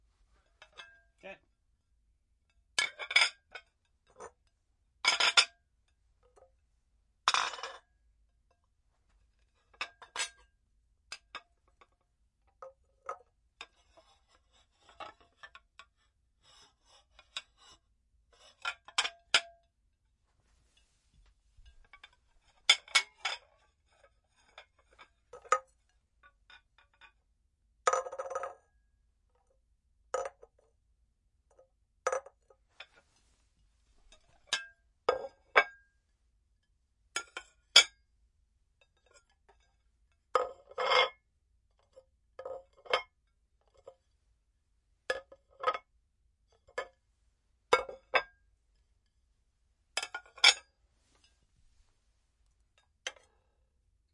Glass dishes
Assorted dishes being sat down, picked up, stacked
bowls, cups, down, glass, plates